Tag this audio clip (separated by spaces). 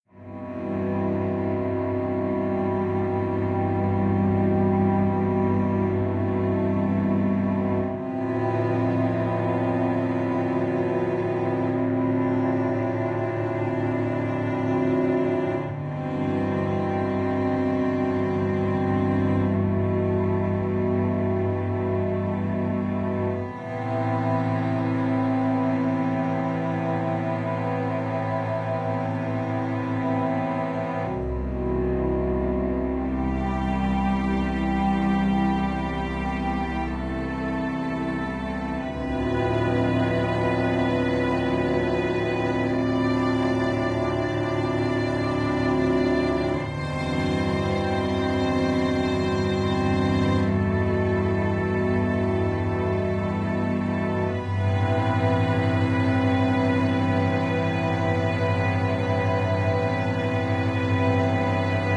cinematic love soundesign ambient movie death strings Orchestra suspense background sad drama film lost score dramatic soundtrack